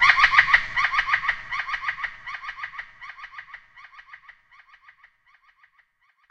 reinsamba Nightingale song happydub6-rwrk
reinsamba made. the birdsong was slowdown, sliced, edited, reverbered and processed with and a soft touch of tape delay.
natural, score, funny, electronic, reggae, spring, bird, nightingale, ambient, birdsong, space, fx, lough, tape, dub, echo, happy, effect, animal, reverb, delay, soundesign